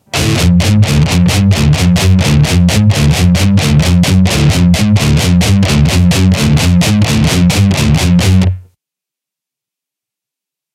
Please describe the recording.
Metal Guitar Loops All but number 4 need to be trimmed in this pack. they are all 130 BPM 440 A with the low E dropped to D